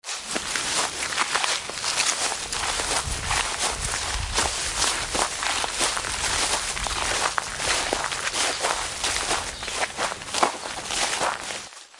Walking in the woods
This is a recording of two people walking on leaves
ambient, field-recording, nature, outside